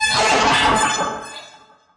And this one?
Strange sound with a glassy, tingly kind of reverb tail.
Maybe useful as it is for special effects, but most likely to be useful if processed further or blended dwith other sounds.
This is how this sound was created.
The input from a cheap webmic is put through a gate and then reverb before being fed into SlickSlack (an audio triggered synth by RunBeerRun), and then subject to Live's own bit and samplerate reduction effect and from there fed to DtBlkFx and delay.
At this point the signal is split and is sent both to the sound output and also fed back onto SlickSlack.
audio-triggered-synth, RunBeerRun, FX, Ableton-Live, feedback-loop, special-effects, SlickSlack, raw-material